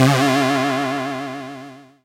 Cartoon, Stunned 03
cartoon, character, colossus, hit, nostalgia, nostalgic, rpg, stun, stunned